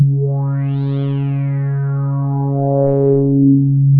1 of 23 multisamples created with Subsynth. 2 full octaves of usable notes including sharps and flats. 1st note is C3 and last note is C5.
little-allen, multisample, subtractive, synthesis, tractor-beam